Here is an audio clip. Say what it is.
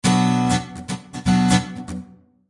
Pure rhythmguitar acid-loop at 120 BPM